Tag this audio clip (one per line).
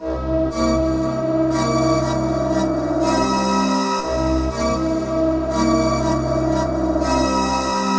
atmospheric
distorted
distortion
drone
noise
pads